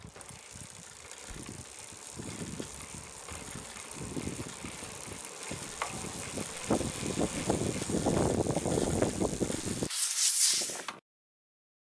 Brake Concrete Med Speed OS
Mountain Bike Braking on Concrete